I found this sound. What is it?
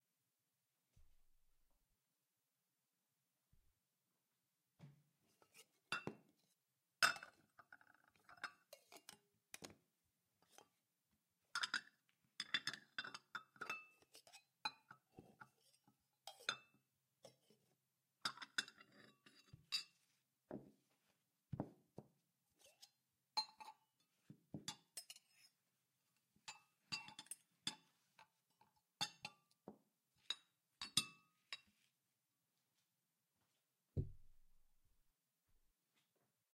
sonido de manipulación de vasos